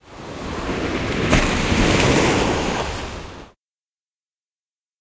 sample of a wave crashing a side of a ship or rocks
boat, sea, ship, water, wave